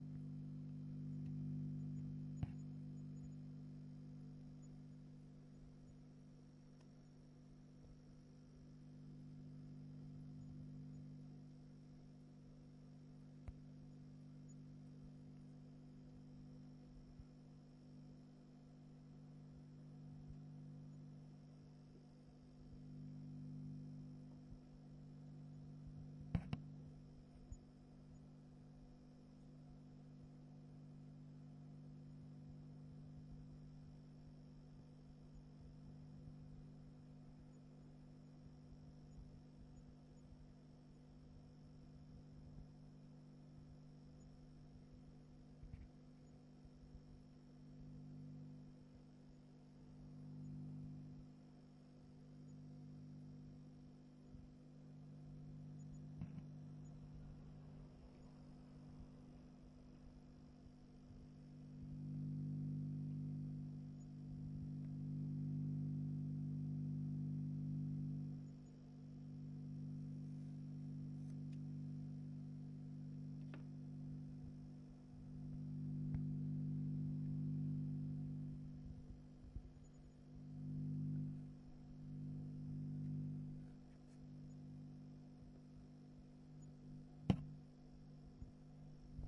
everything should be recorded. hypnoise
From ESBR-pack. Low frequency hypnotic noise.
hypnotic, deep, electric, noise